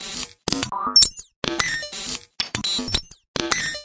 Elctroid 125bpm05 LoopCache AbstractPercussion
Abstract Percussion Loops made from field recorded found sounds